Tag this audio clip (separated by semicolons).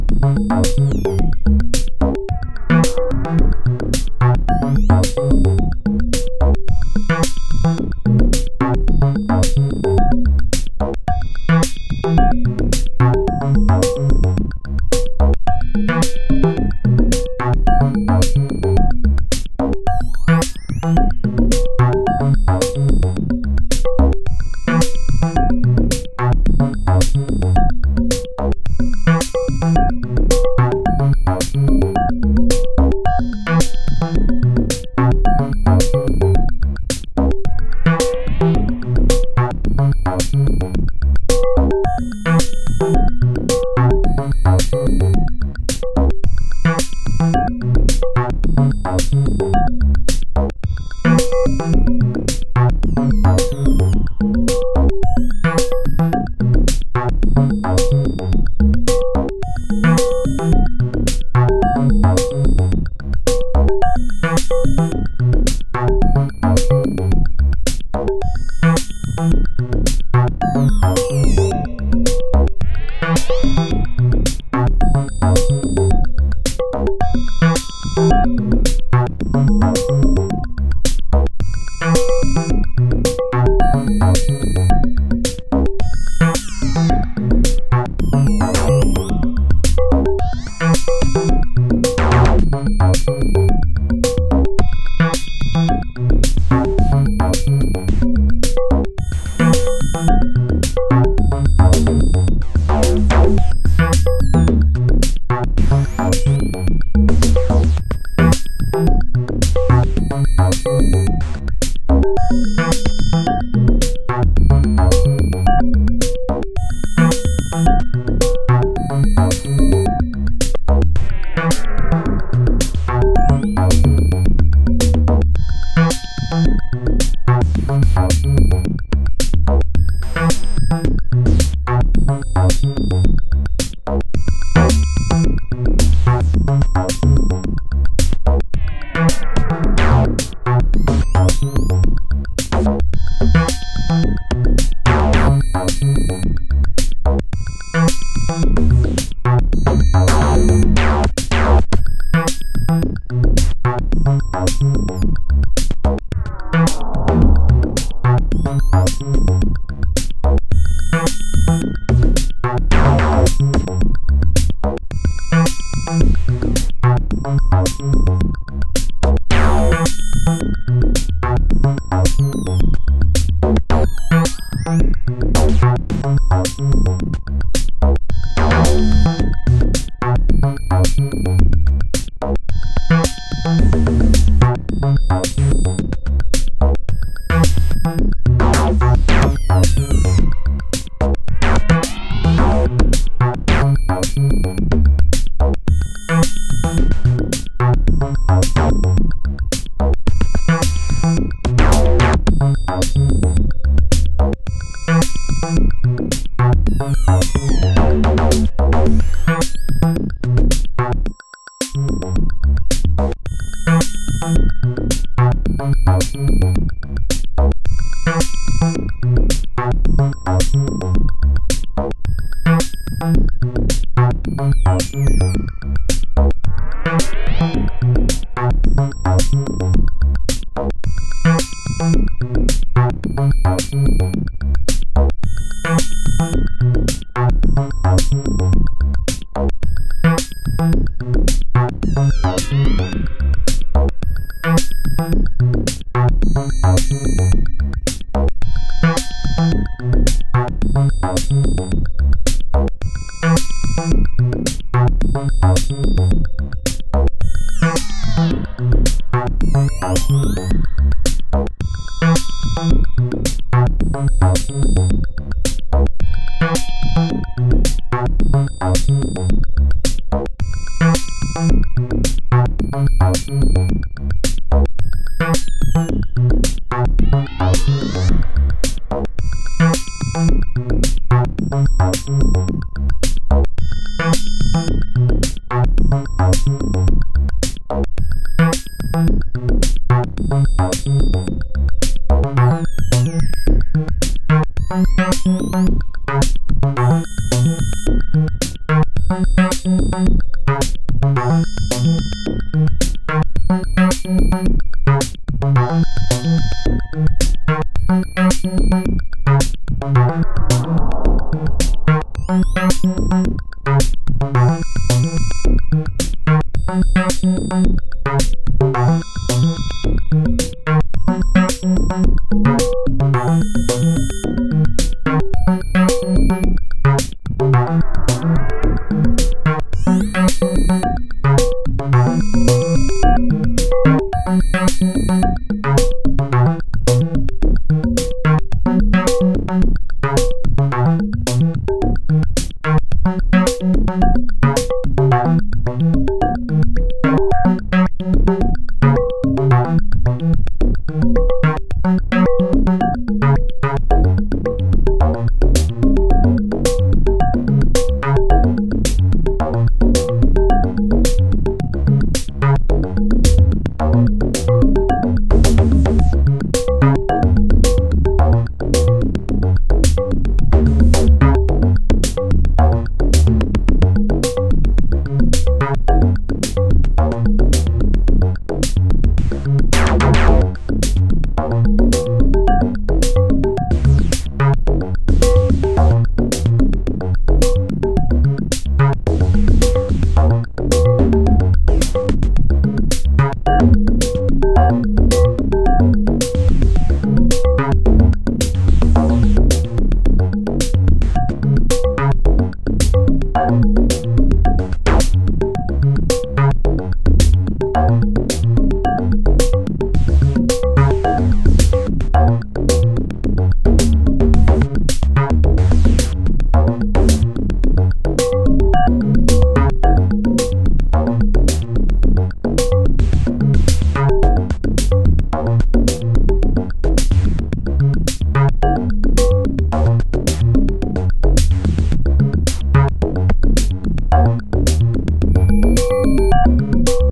beat; electronic; drum; drums; modular; glitch; synth; digital; noise; synthesizer; bass; loop